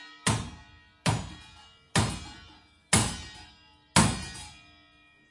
Steel Disk Bang Multiple
Metal, Tool, Steel, Plastic, Tools, Hit, Boom, Smash, Bang, Impact, Crash, Friction